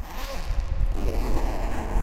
VALENTIN Alexis 2015 2016 motorbike-engine
Taken from a zip from a pencil case, an simple reverb effect was added to make it sounds like it happens on the street. It could be compared as a motorbike engine (as weird as it may sound), but very high-pitched.
road, lonely, motorbike, echo, motorcycle, street, traffic